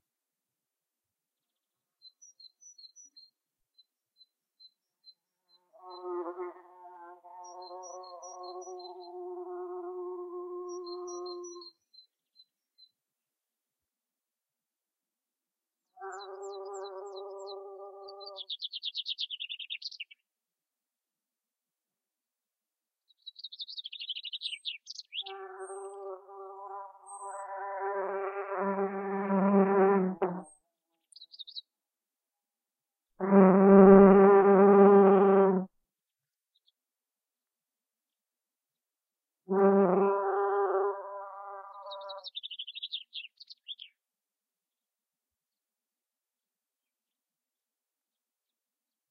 A stereo field-recording of a Bee foraging for nectar on a spring flowering Heather plant. I don't know what species of bee it was but it was smaller than a Bumble Bee (Bombus terrestris) and larger than a Honey Bee (Apis mellifera). Sony ECM-MS907 > FEL battery pre-amp > Zoom H2 line in.
ms, birds, field-recording, stereo, bee